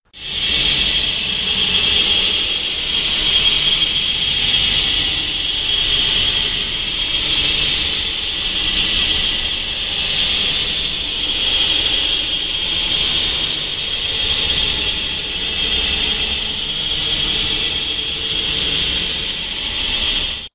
Original track has been recorded by Sony IRC Recorder and it has been edited in Audacity by this effects: Paulstretch.